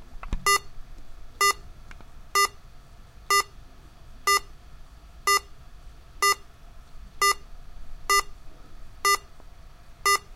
A laptop alarm tone.